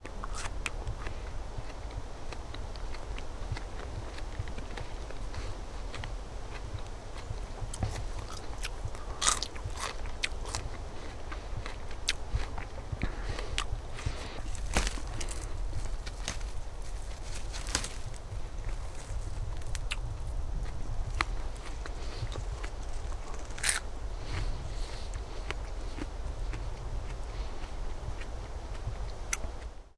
Beeren - Essen und Pflücken
Picking and eating berries in the woods
chewing, eating, field-recording, forest, picking